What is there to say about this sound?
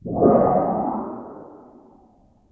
Big sheet wave 2
All the sounds in this pack are the results of me playing with a big 8'x4' sheet of galvanised tin. I brushed, stroked, tapped hit, wobbled and moved the sheet about. These are some of the sounds I managed to create
metal, hard, unprocessed, experimental, metalic, resonant, textural